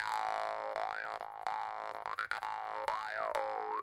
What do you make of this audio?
Vargan PTD loop 005
Vargan -> Oktava MKE-15 -> PERATRONIKA MAB-2013 -> Echo MIA midi.The timbre of the tool is lowered.